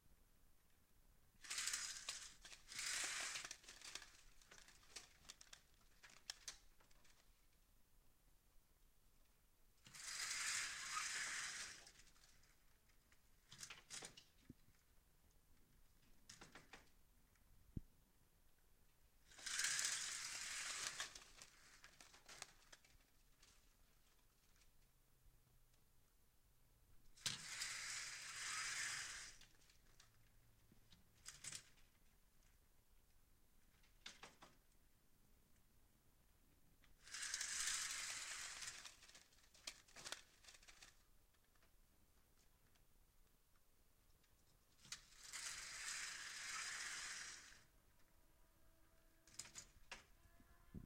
Sliding Door Blinds
mono recording of blinds opening and closing
sliding, blinds